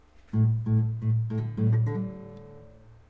guitar strings plucked